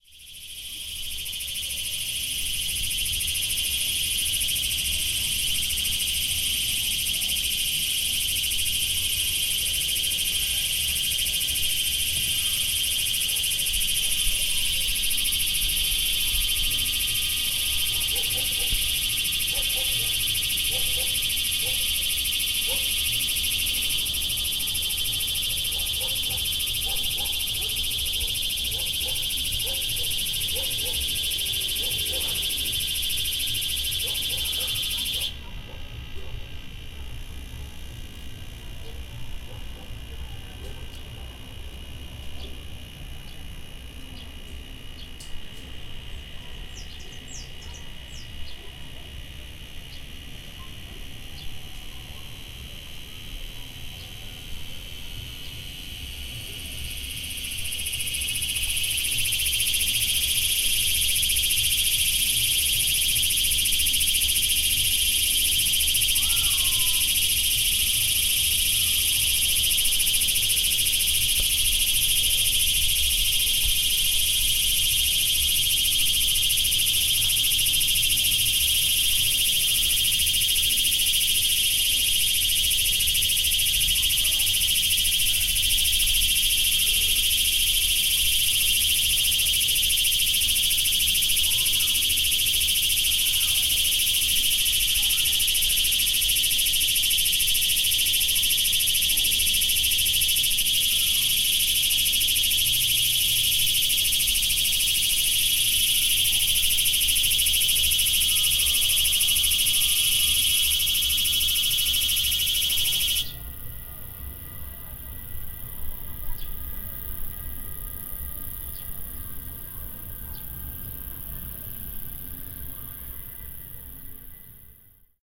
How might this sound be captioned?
FR.CTC.08.CoyuyoS.006
intense cicada (Quesada Gigas) ambience.
noise, cicadas, nature, sound